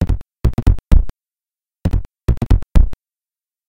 Billy the Boxer count4
Another novelty Industrial LOOP! Made from BtB1!